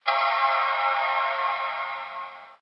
MacPro Startup
startup, Macpro, signal